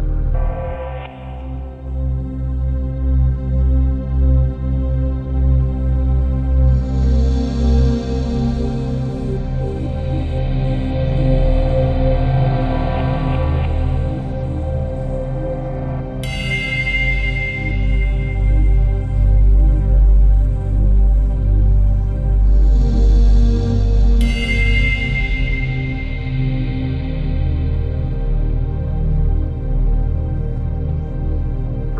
reversed, layer, chimes